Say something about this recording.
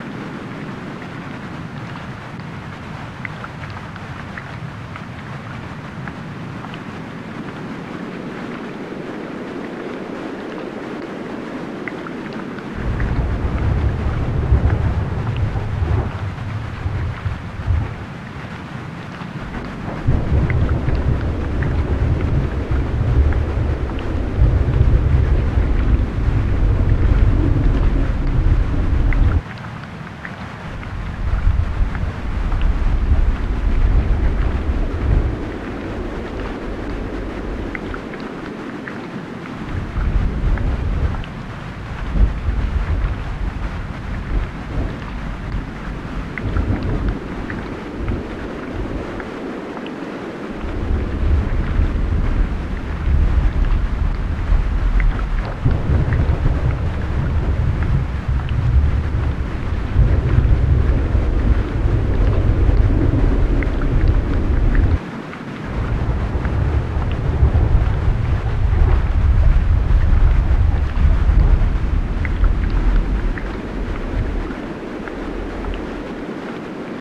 Synthetic Thunderstorm
A thunderstorm, synthesized in Ableton using basic subtractive synthesis and sample manipulation.
Ableton, rain, rainstorm, storm, synthesis, thunder, thunder-storm, thunderstorm